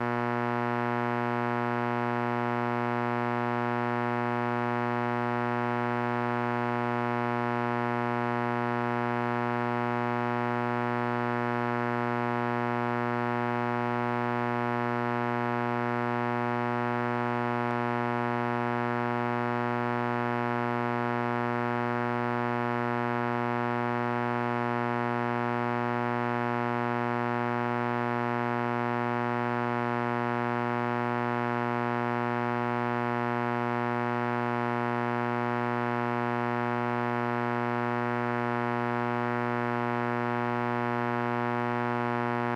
Lamp EMP
The EMP of a lamp when it is on. Indoor. Recorded on Zoom H2 with contact mic.
contact, pulse, emp, elelctronic, magnetic, field-recording, lamp